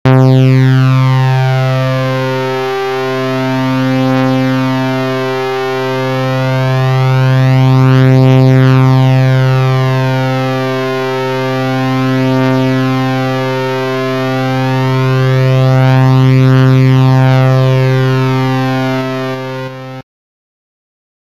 Simple 3Saw C4 Note detuned MONO Sample; created in Milkytracker with the Synthesisfunction in the Sampleeditor, the Instrumentseditor plus one Pattern to execute the C4 Notes. This may be loaded into a Sampler and edited with Envelopes and a Filter etc. to get a complete Sound. Thanks for listening.